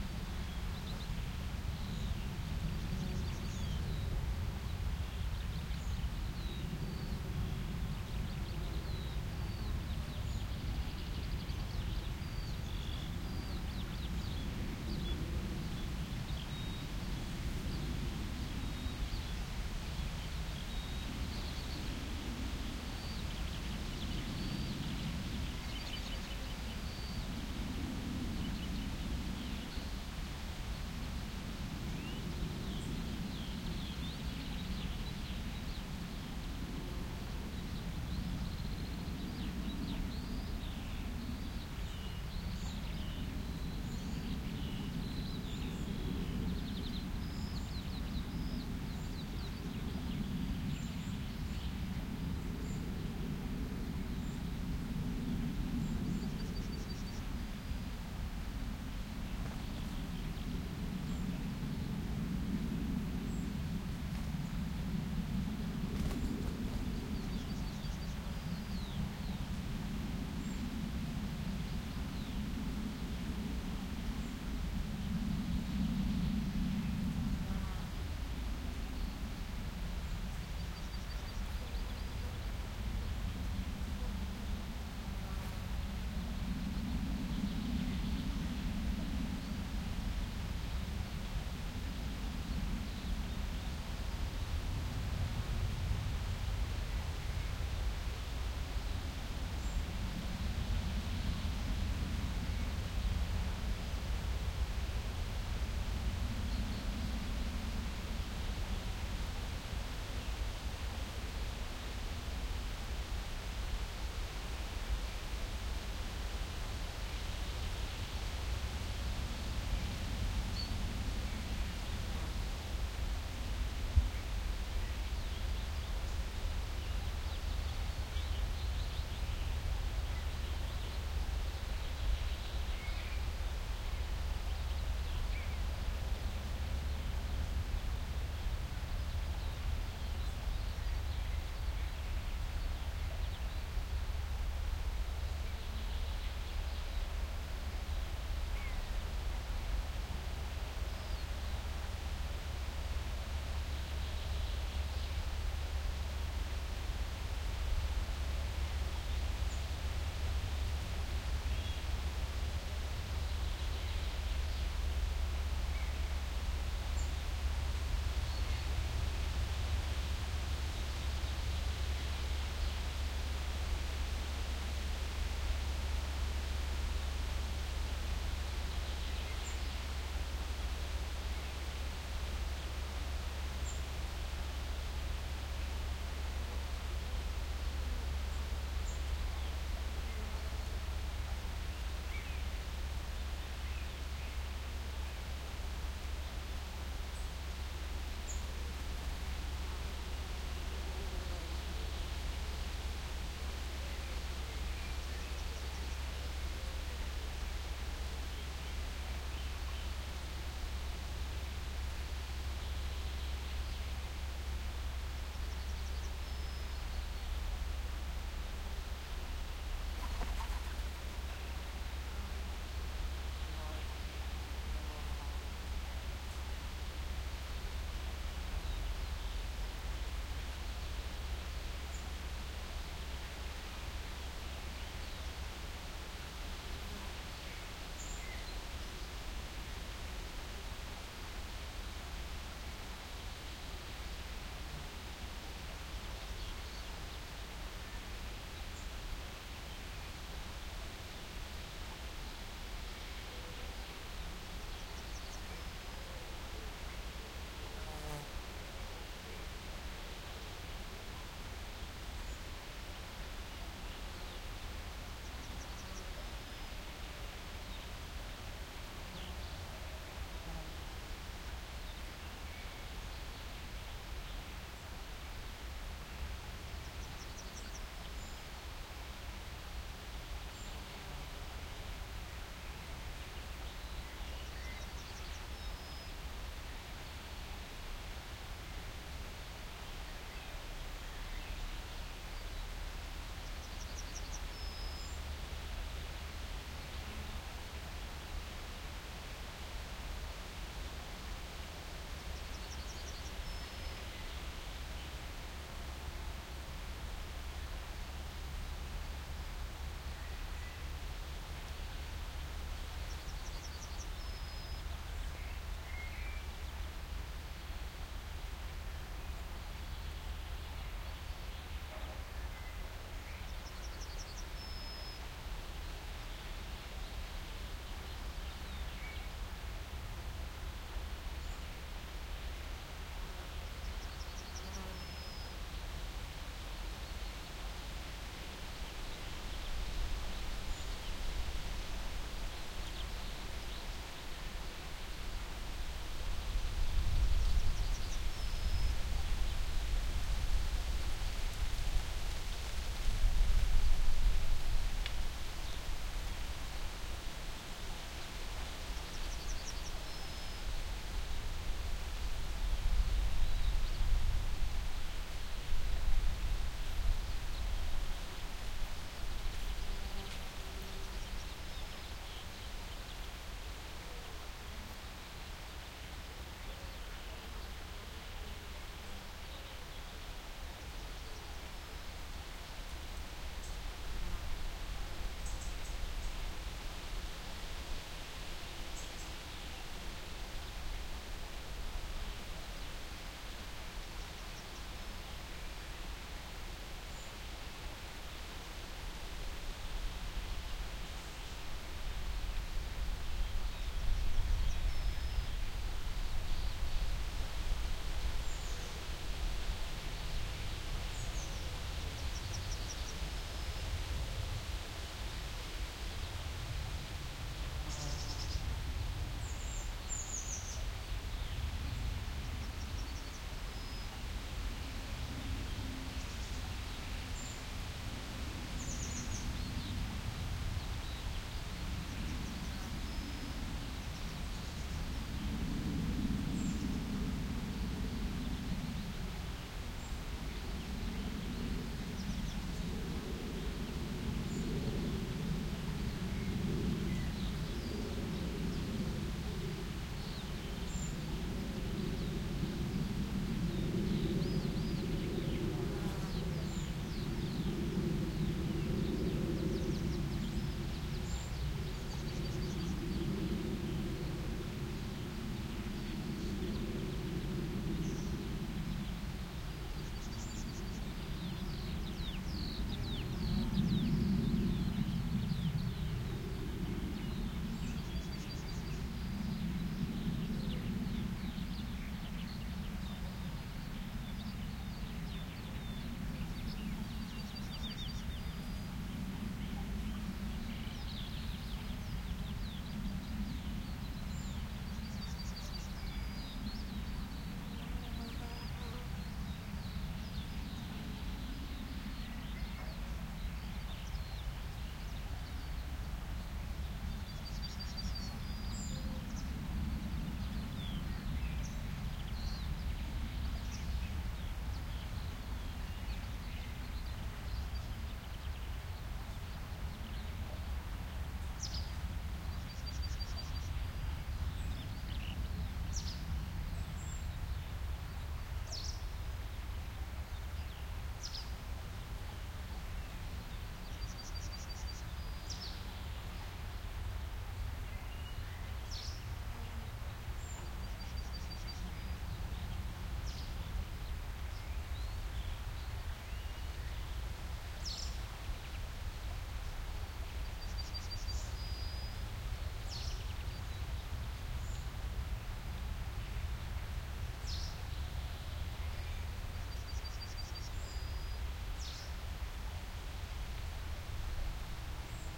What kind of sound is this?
field-recording
The sound of wind from a forest nearby, a distant skylark, a yellowhammer, some airtraffic, some insects of an otherwise pretty dull recording. The scenery was brilliant, but you can´t hear that. MKH 40 microphones into Oade FR2-le recorder.
field, summer, wind, field-recording, breesze